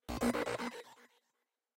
cabo del 04
efeitos produzidos atraves de um cabo p10 e processamentos!!